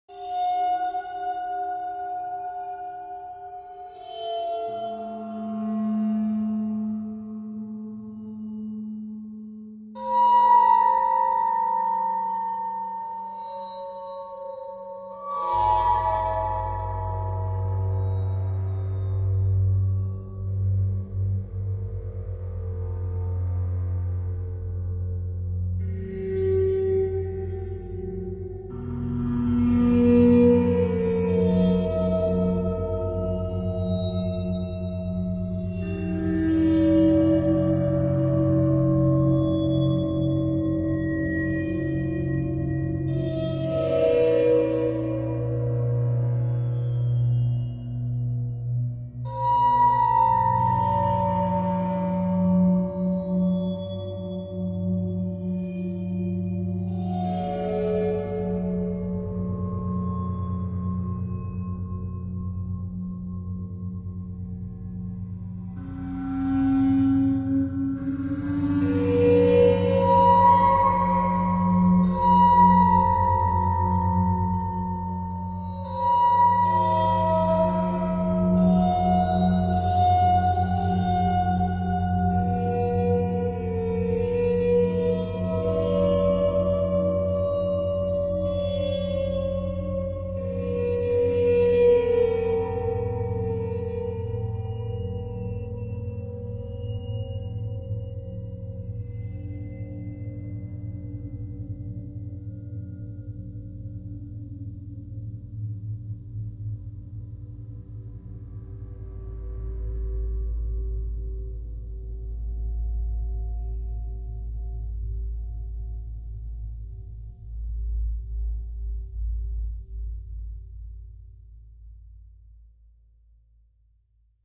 Haunted Water
A short abstract soundscape with melodic elements, produced by processing acoustic sounds recorded live in Kontakt and subsequently manipulated in an audio editor.
abstract, bowed, electronic, metallic, moody, processed, resonant